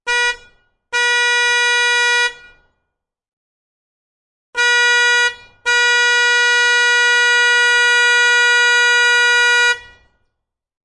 school bus truck horn honk 100m away
bus, honk, horn, school, truck